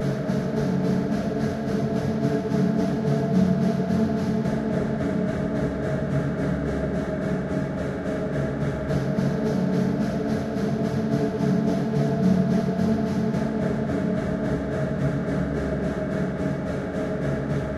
Night Synth Sequence
Electronic, Synth, Night, Sci-fi